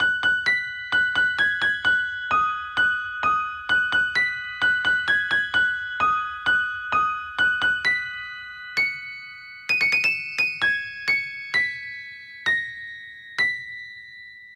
puppet music box recreated
remember to wind that music box everyone
fnaf, puppet, music-box